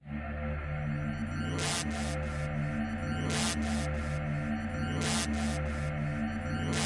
140 bpm sound fx 4
140 bpm dubstep sound fx
140-bpm, dubstep, sound-fx